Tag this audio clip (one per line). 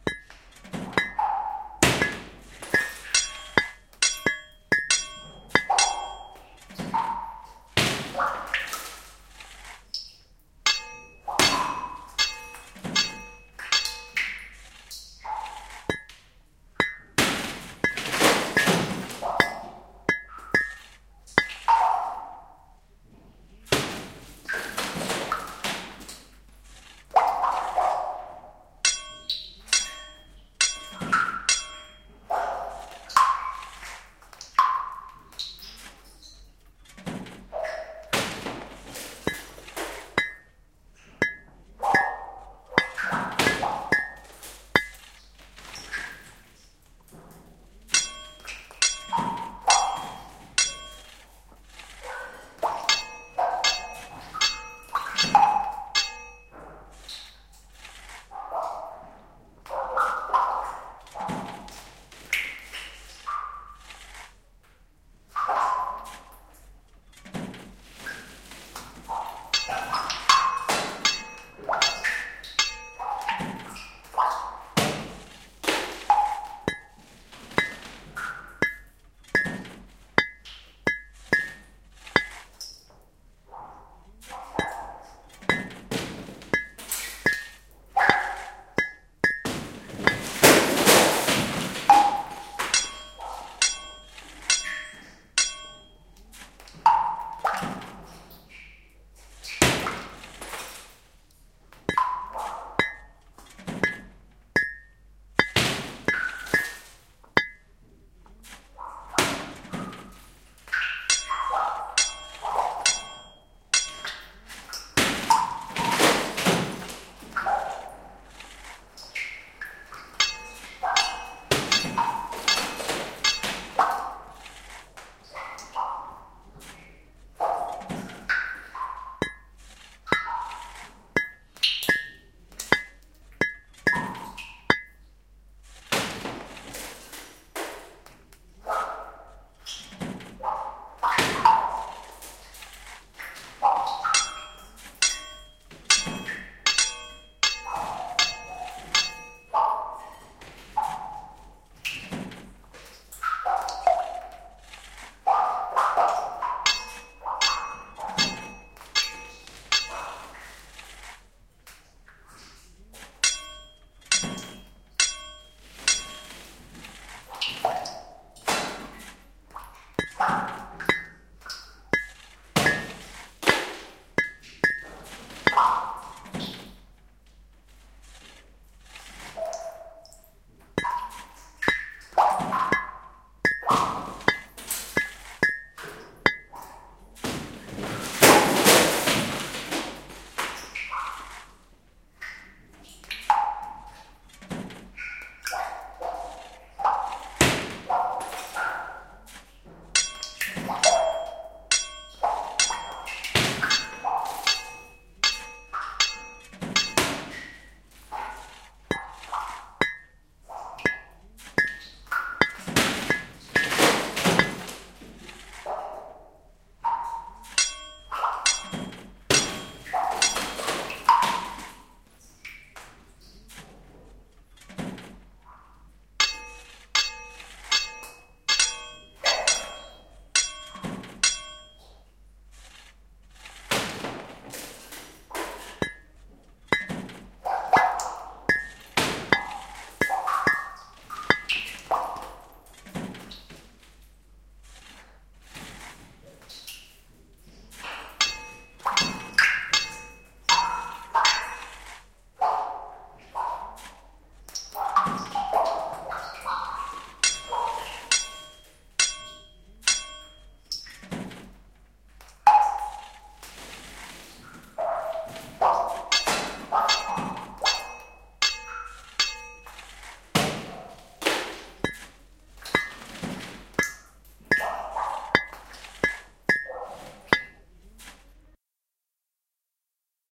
Mine
mining
digging
dig